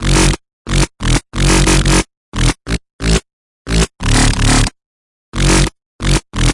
BPM140-BASSGROWOBBLE - 24thElement
sub, dubstep, bass, wobble